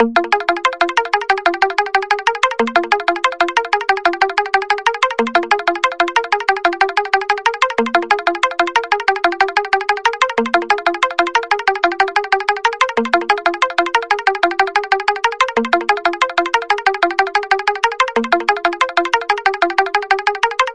Trance Pluck 3 (185 BPM)
Another one made in serum this sound is not dry added some delay to make it sound cool
6x6, DJ, Path, Signal, Whatever